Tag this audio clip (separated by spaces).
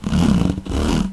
plastic ecology waste